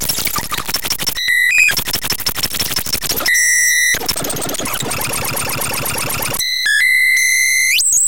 more squeaky cartoon-like sounds; done with Native Instruments Reaktor and Adobe Audition